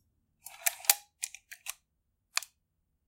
Charging an 1957 Rolleiflex (no shoot sound incluse)